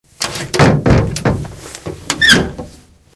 B5 window-opening
opening the wooden window of the room